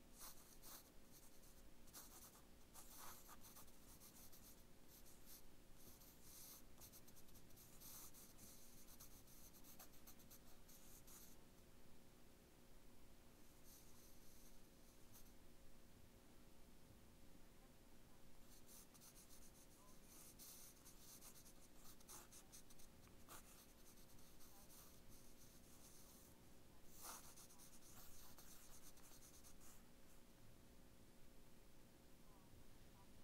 Random pencil sketches on paper. I think I was actually drawing a monster. Recorded with an U87 and an ME66
draw, drawing, paper, pencil, scribble, sketch, write, writing